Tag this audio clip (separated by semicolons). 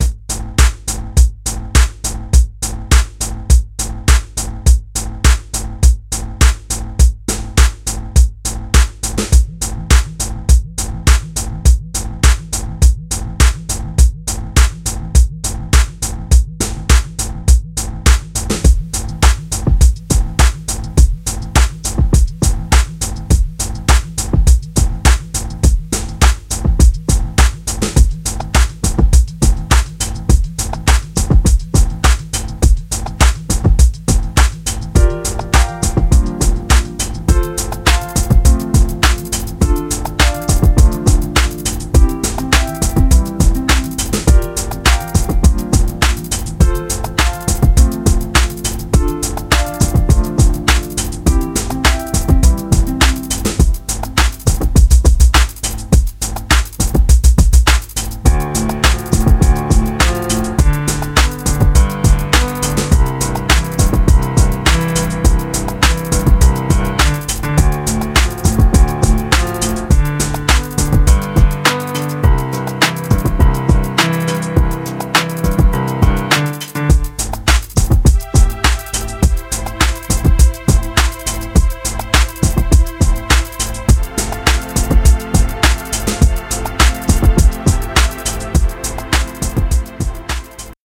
synth; loop; electronic; electro; bass